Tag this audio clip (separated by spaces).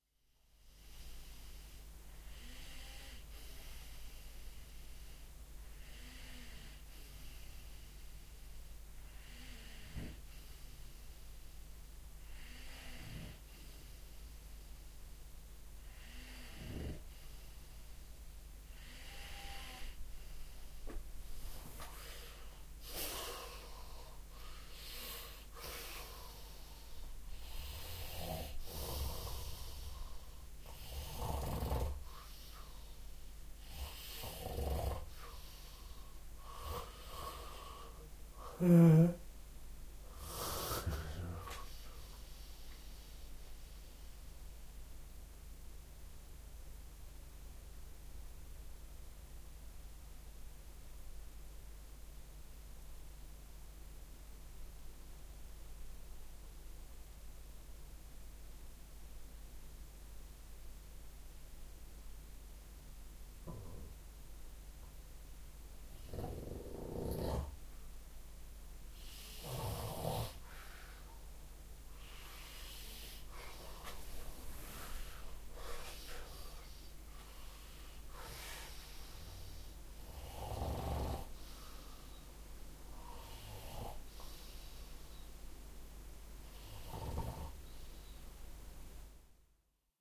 bed body breath field-recording human nature